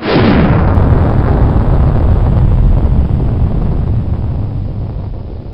Explosion sound created at work with only the windows sound recorder and a virtual avalanche creation Java applet by overlapping and applying rudimentary effects.